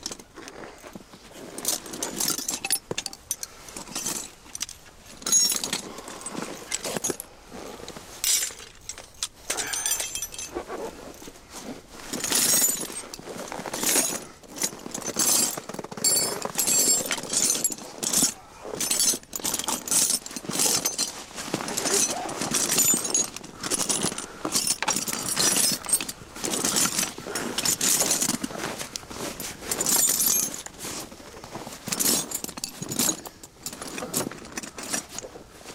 tools rummaging through tools ext metal debris